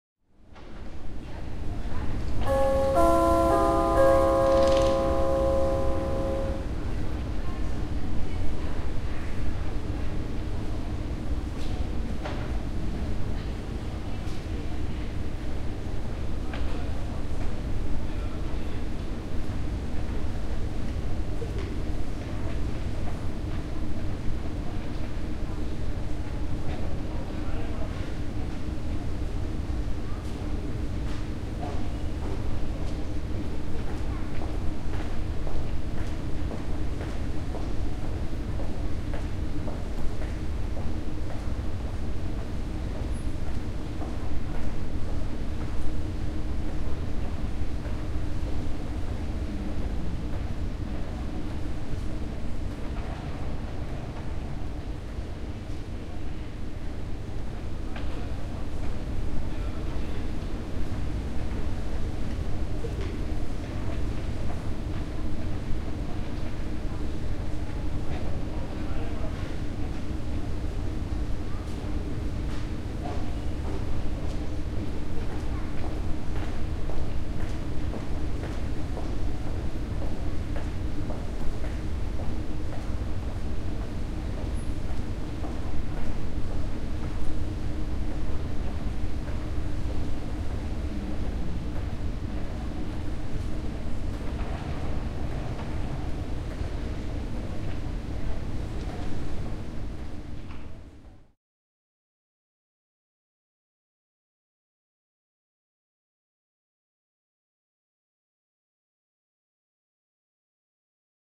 Subway Signal at Platform
Ambience at a subway platform, footsteps, people in the distance, no trains. The recording starts with an announcement signal but there is no following announcement. Recorded at a Vienna subway line, XY Recording to Tascam DAT, 1998.